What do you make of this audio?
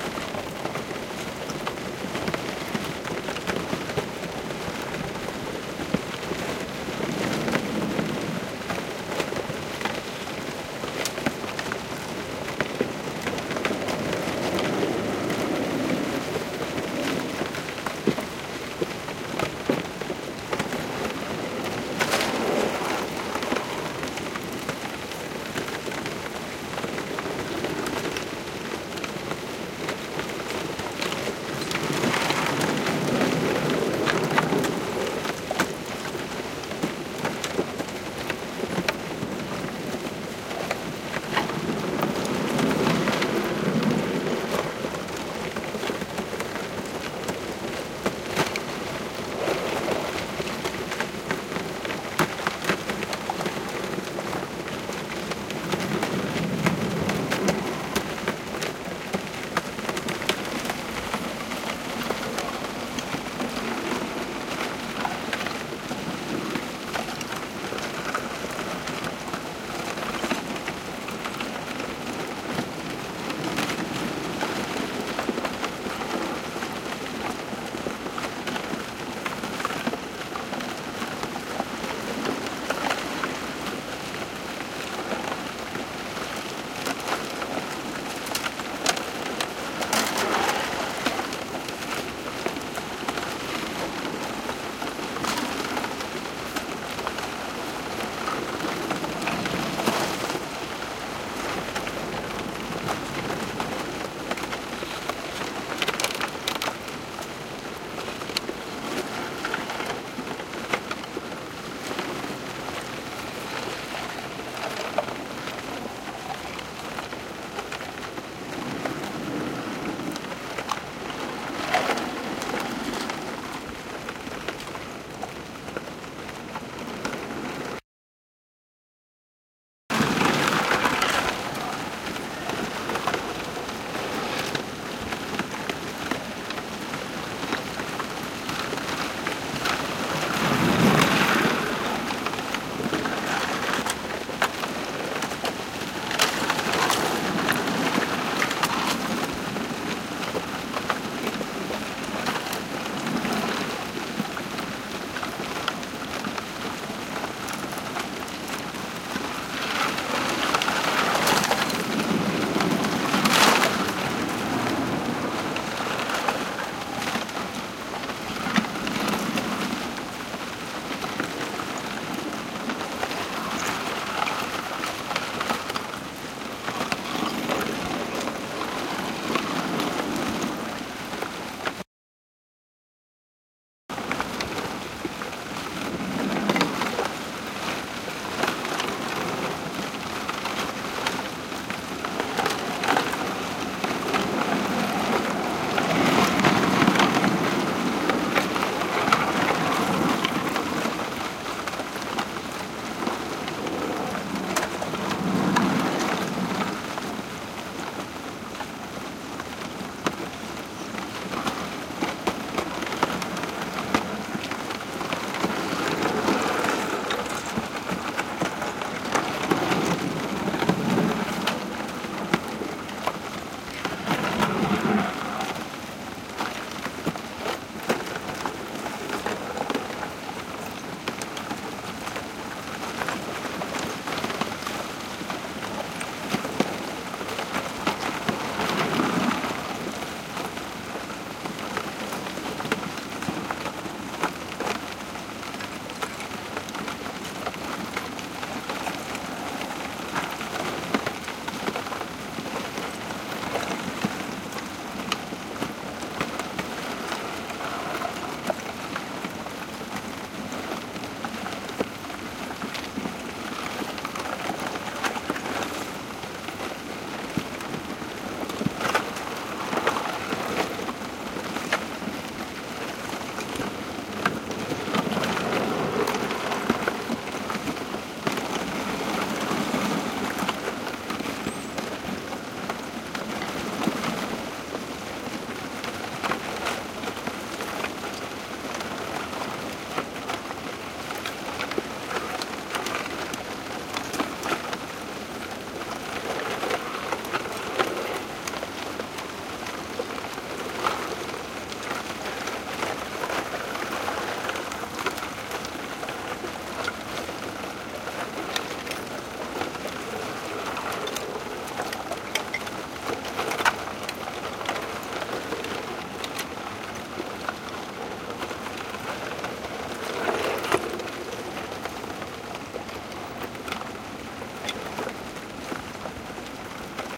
ice seekonk river
Ice floe breaking as it hits the shore of the Seekonk River in Providence, Rhode Island, USA. This recording contains three takes, from different positions. Recorded with a Sony PCM-D50. 120-degree mic pattern (wide stereo image). Some editing and compression/limiting has been applied.